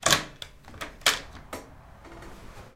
door opening 1
Lift Door opening spoken voice
Door, Lift, opening